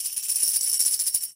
tambourine shake long
tambourine sample recorded with a Sony MC907 microphone